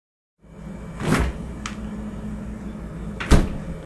Fridge open close
fridge door being opened and closed
refrigerator, freezer, fridge